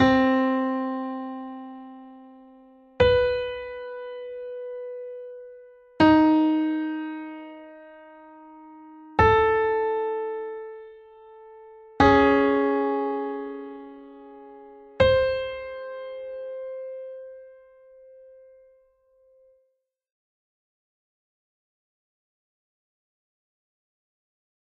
Ninth Pentacle of Aural Symmetry